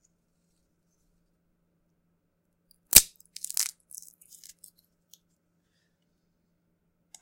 wood break small 1
Small batch of popsicle sticks being broken.
wood-snap, popsicle, break, breaking-sticks, snap, sticks, breaking-wood, wood